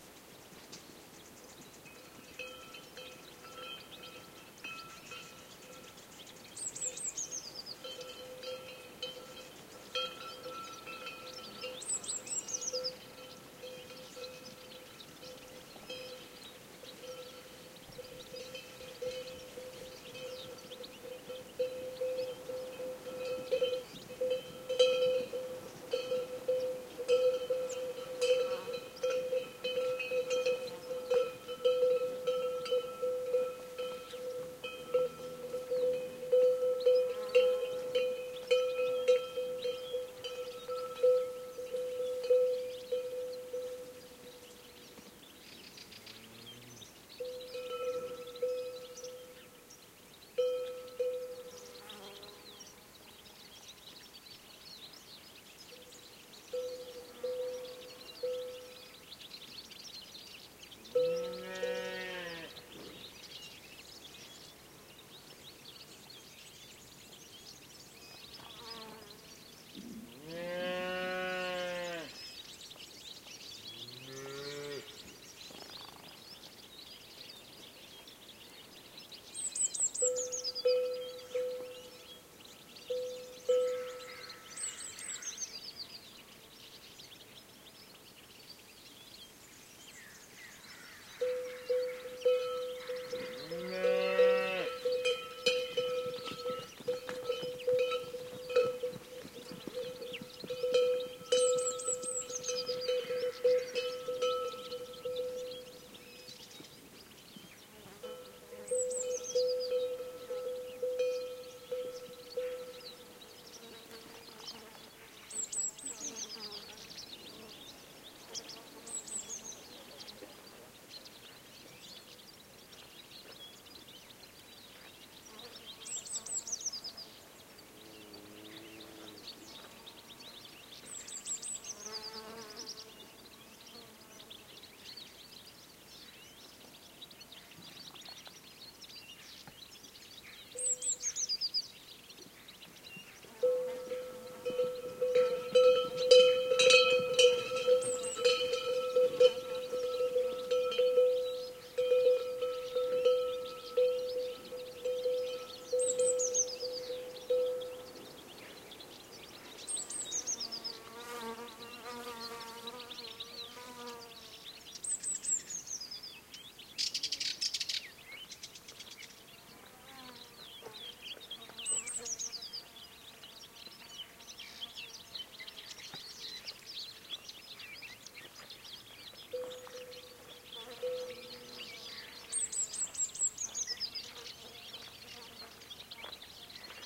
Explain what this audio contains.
20061224.dehesa.cows
ambiance in a Dehesa (open Oak forest, southern Spain) during winter. You can listen to several bird species, cowbells. Recorded at Dehesa de Abajo, Puebla del Río, Sevilla
forest, birds, ambiance, cattle, winter, field-recording, dehesa, south-spain, nature